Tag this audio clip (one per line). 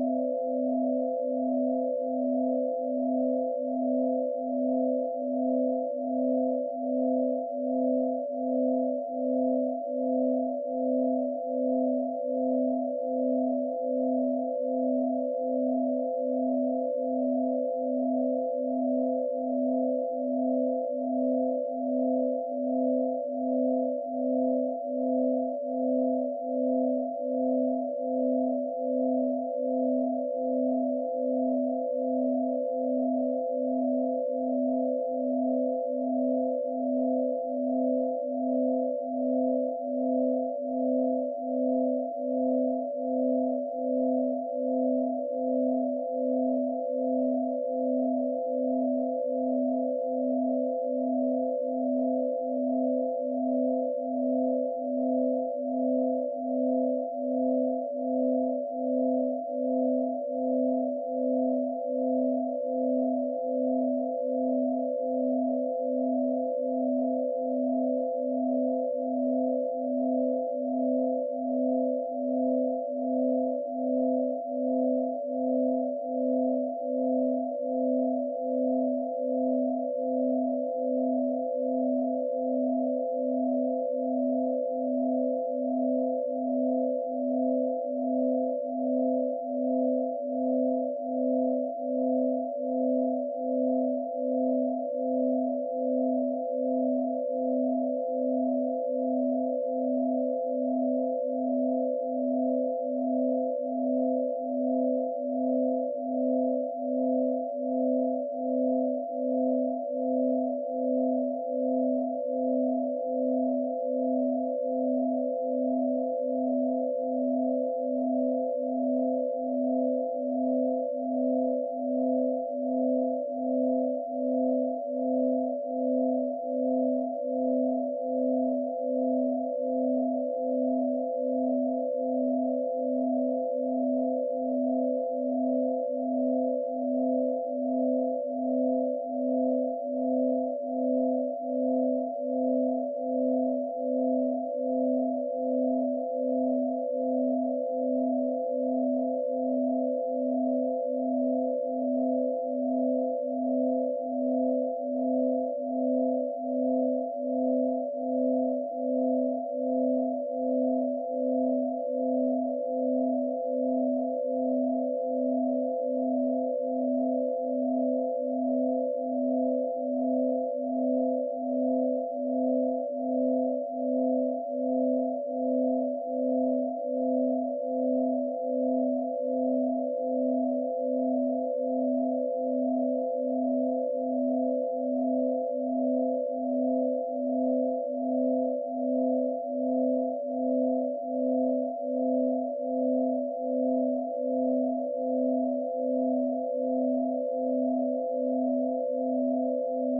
background
loop
experimental
sweet
ambient
electronic
pythagorean